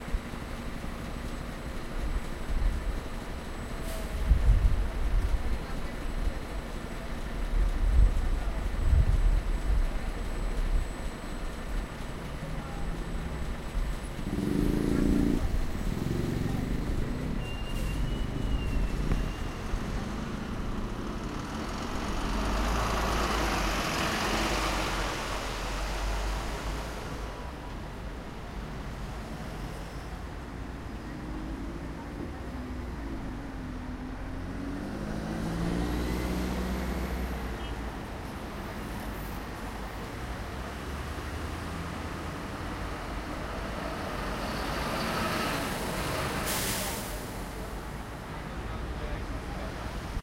bus stop
evening, busy-street, transportation, bus-stop, car, city, urban